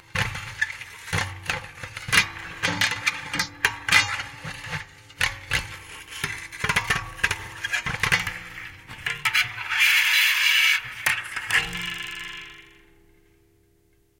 spirit of the pinball machine
recordings of a grand piano, undergoing abuse with dry ice on the strings
piano, torture, dry, abuse, scratch, screech, ice